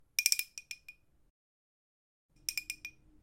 Clay bell sounds.